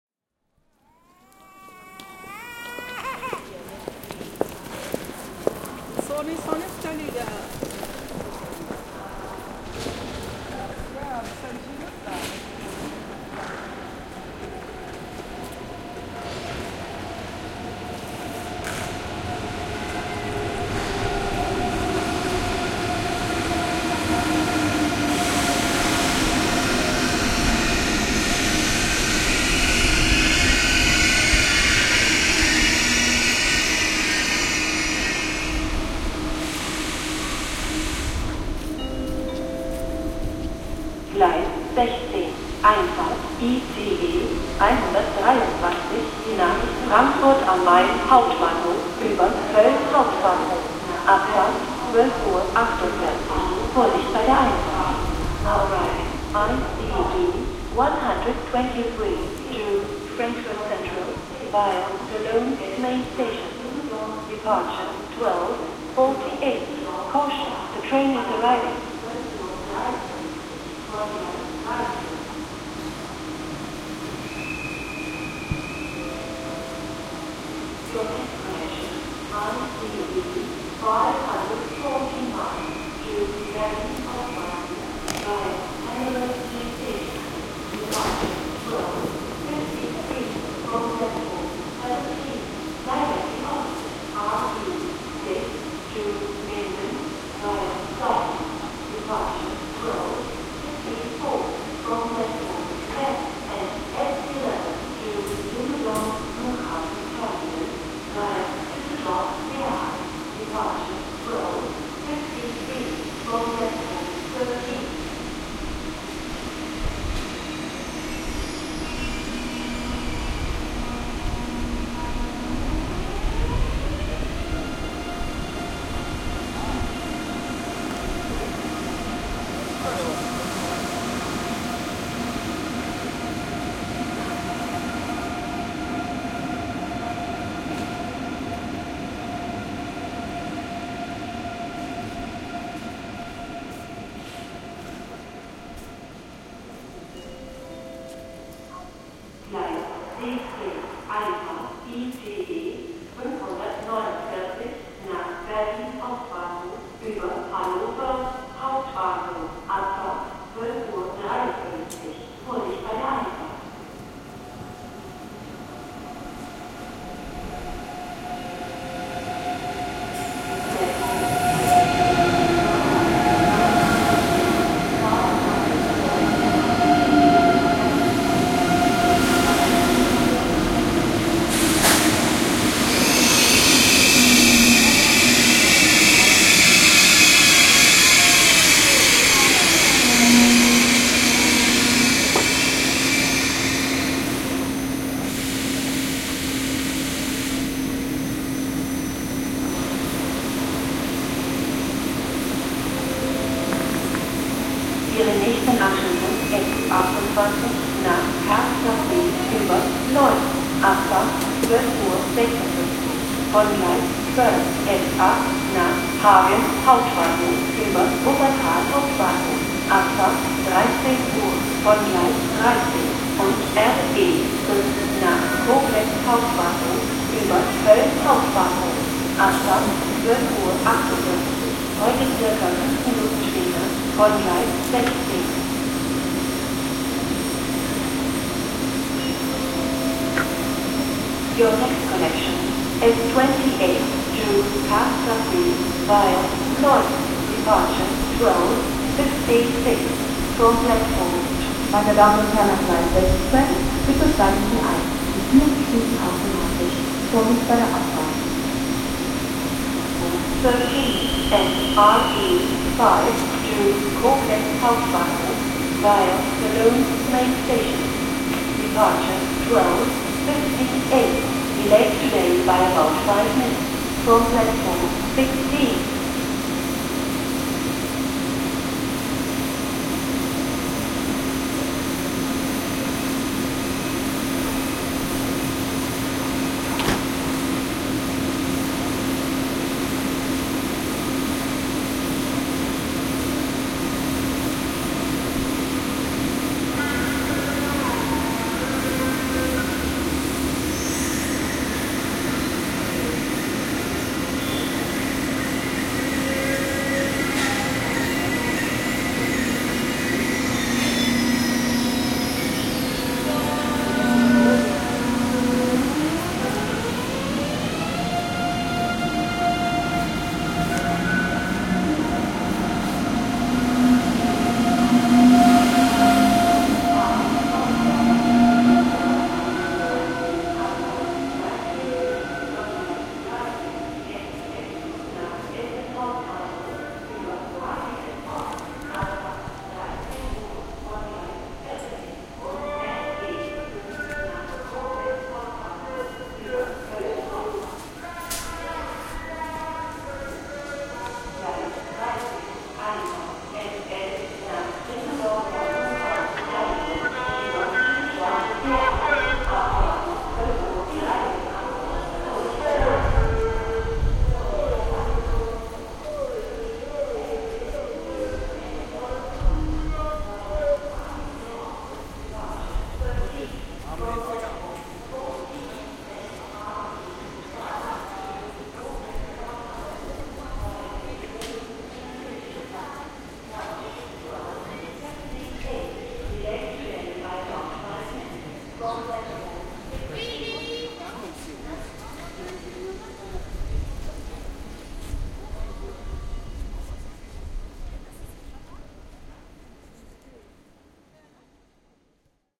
German Train Station Ambience
Background ambience of a German Train Station. Some announcements are being made (German and English), trains are arriving and leaving, a few people are talking and a drunk socker fan with a megaphone is bawling about football songs.
Loudspeaker, Depot, Station, Fan, Football, Train, Megaphone, Rail, Platform, Socker, Engine, Railroad, Announcement, Singing, Railway, Tracks